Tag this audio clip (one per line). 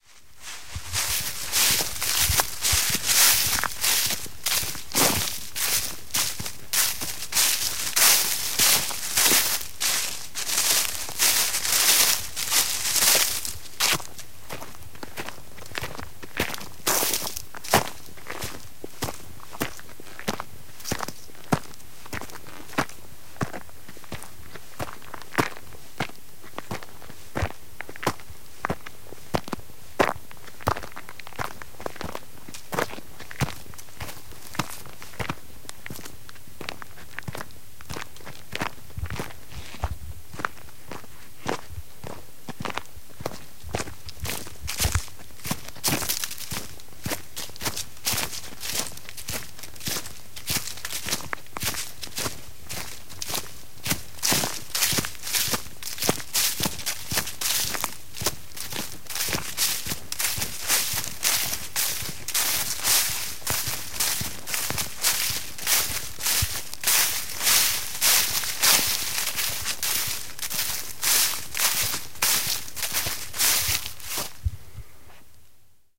foot-steps
trail
walking
fall
footsteps
steps
sound-scapes
winter
leaves
nature